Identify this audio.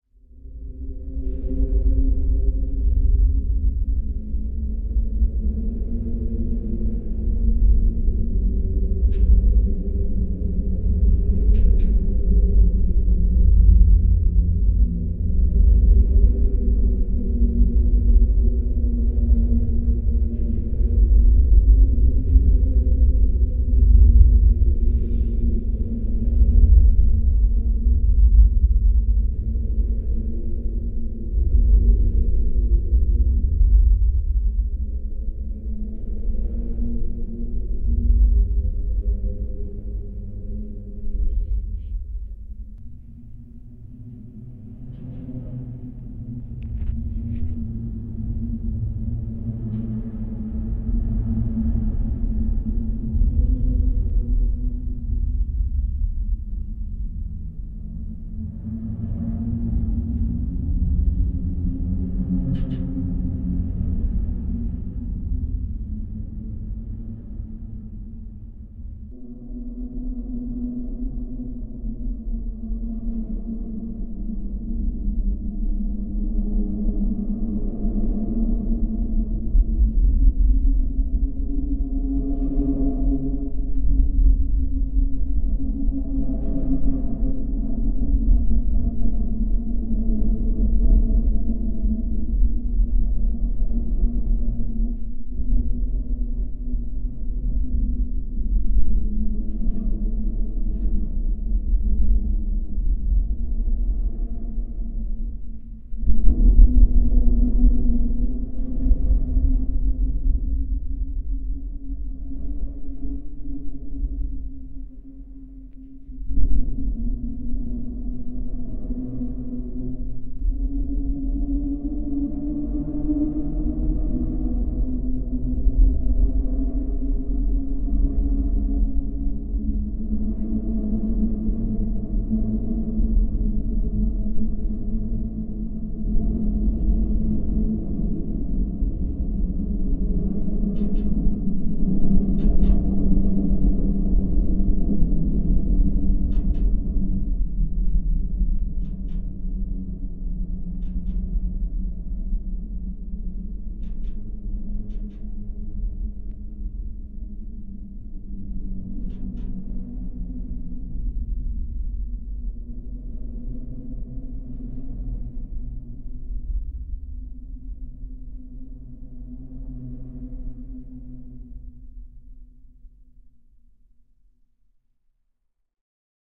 Waterdale Bridge Contact Mic
Traffic on Walterdale Bridge in Edmonton recorded with contact mic in July 2010. Soft undulating hum.
Darren Copeland